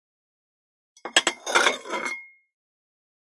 Taking plate
food, take